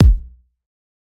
made by mixing synthesized sounds and self-recorded samples, compressed and EQ'd. this one's pretty old, i've used it to make a lot of different kicks.